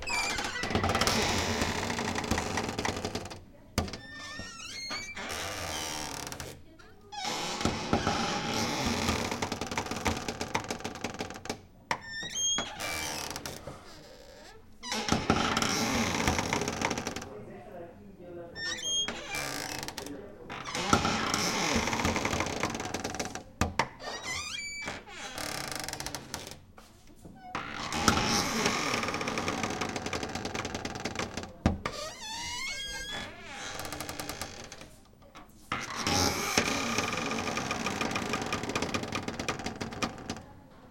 wood door closet or spring screen door open close creak slow ship hull list +bg voices
close, closet, creak, door, hull, list, open, or, screen, ship, slow, spring, wood